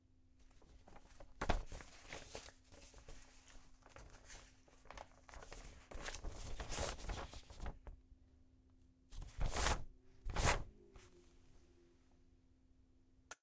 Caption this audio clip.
Flipping through a comic book.